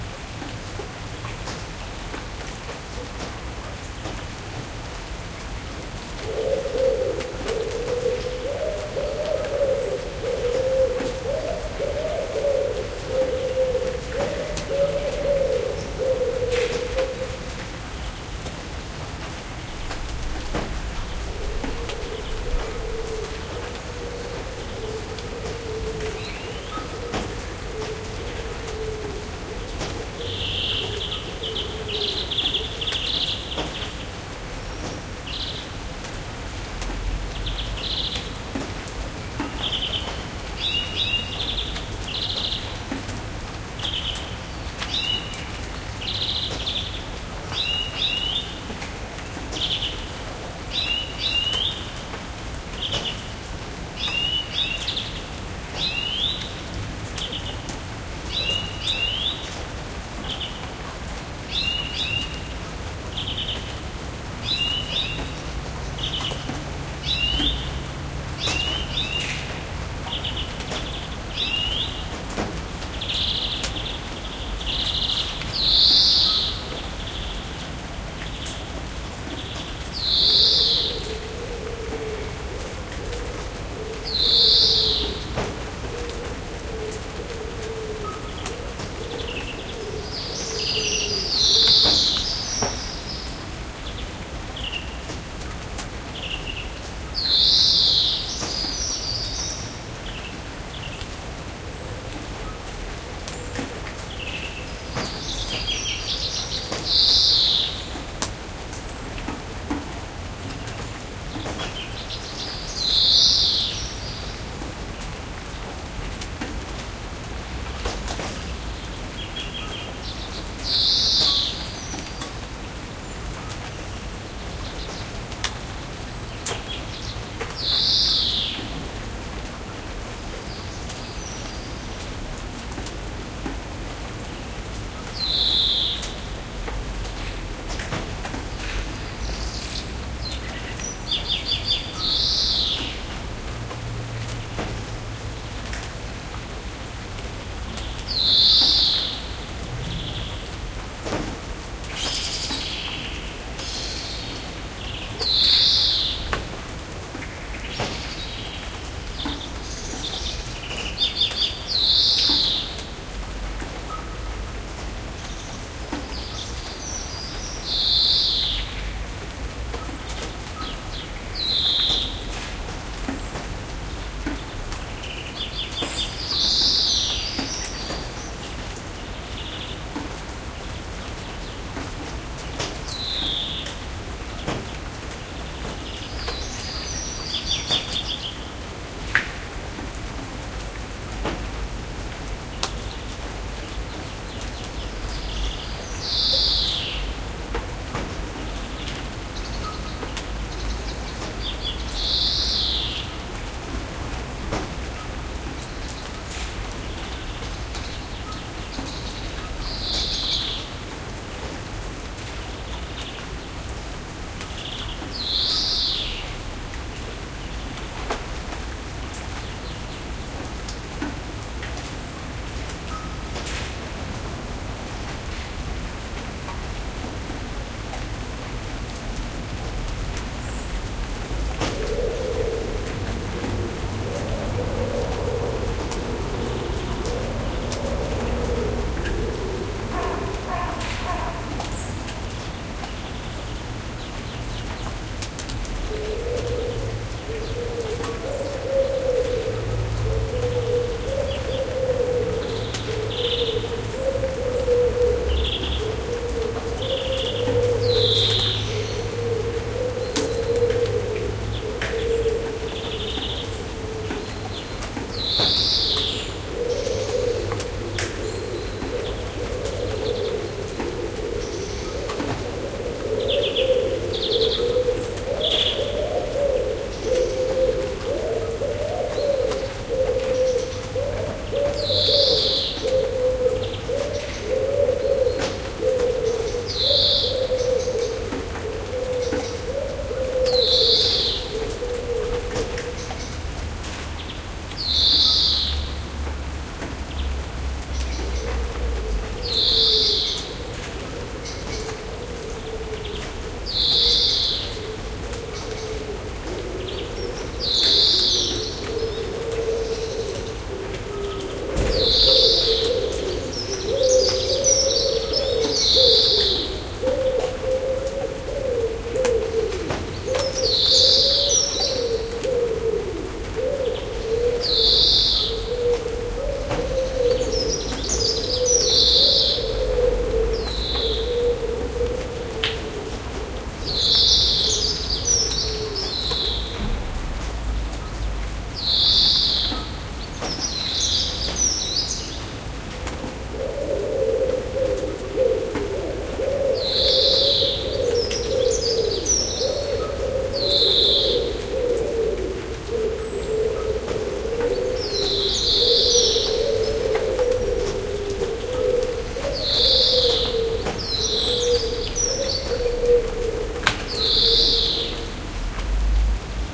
Rain in the Backyard
Same backyard, same equipment (Sony TCD-D8, AT 822 microphone and Prefer preamp) then the "Morning has broken track", this time with rain.